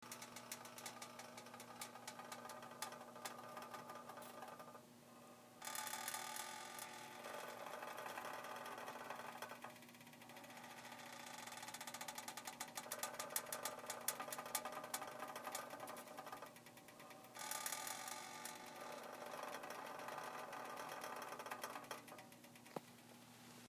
Creaky Stove
A percussive, creaky electric stove slowly warming up
homemade, household, found-sound, percussive, soft, percussion, noise, noisy